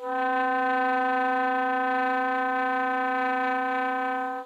One of several multiphonic sounds from the alto sax of Howie Smith.
multiphonic, sax, howie, smith